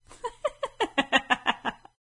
The witchy laugh of a woman age 30's or 40's
Original recording: "0524 Laugh" by Sage Tyrtle, cc-0